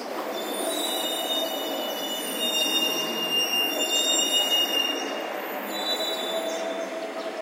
high pitched sound of truck brakes, good to check your audition threshold for high frequencies /sonido agudísimo de frenos de un camión, util para averiguar tu capacidad auditiva en frecuencias altas